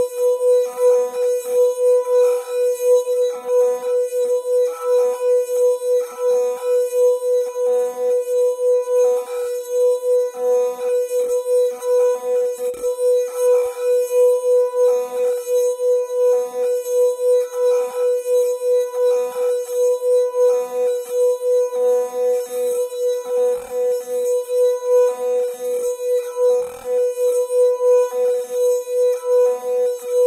Wine Glass Sustained Hard Note B4
Wine glass, tuned with water, rubbed with pressure in a circular motion to produce sustained distorted tone. Recorded with Olympus LS-10 (no zoom) in a small reverberating bathroom, edited in Audacity to make a seamless loop. The whole pack intended to be used as a virtual instrument.
Note B4 (Root note C5, 440Hz).